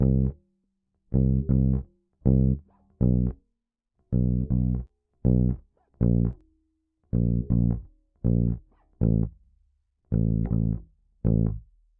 Dark loops 002 bass dry 80 bpm
80, 80bpm, bass, bpm, dark, loop, loops, piano